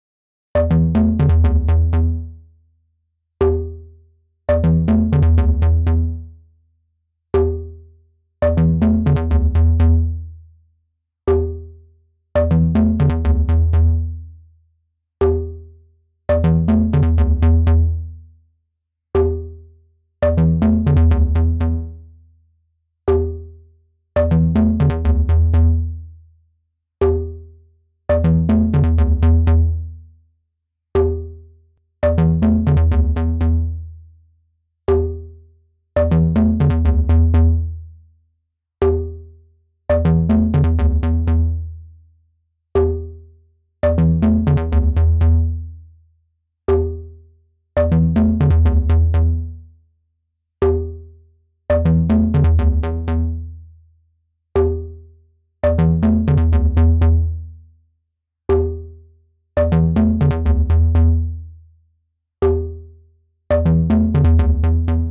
Some recordings using my modular synth (with Mungo W0 in the core)